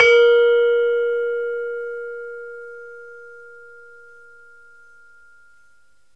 Sample of a demung key from an iron gamelan. Basic mic, some compression, should really have shortened the tail a bit. The note is pelog 6, approximately a 'Bb'
gamelan, pelog